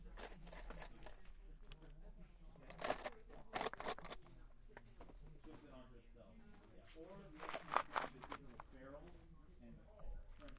dropping more nails in a plastic box
one in a series of recordings taken at a hardware store in palo alto.